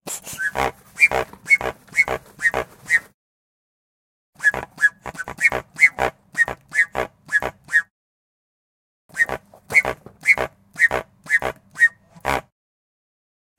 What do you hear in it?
Air pump Manual Quacks 01
Manual pump. Air release.
field-recording, quacks